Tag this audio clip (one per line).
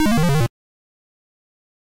blip
pong
beep